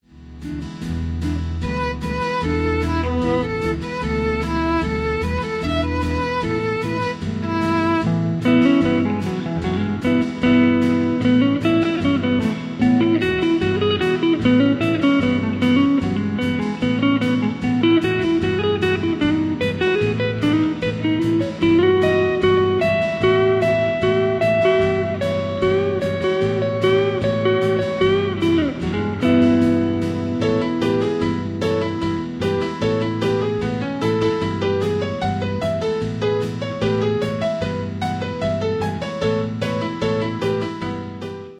Country Road
A happy country style song with various instruments.
acoustic, violin, happy, tonk, country, midwest, music, america, farm, usa, piano, guitar, song, cowboy, nature, honky